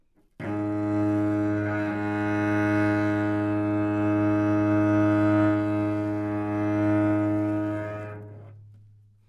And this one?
Part of the Good-sounds dataset of monophonic instrumental sounds.
instrument::cello
note::Gsharp
octave::2
midi note::32
good-sounds-id::2629
Intentionally played as an example of bad-timbre-errors